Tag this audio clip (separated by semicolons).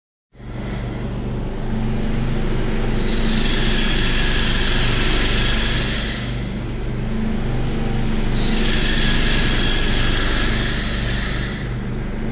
industrial; sound-effect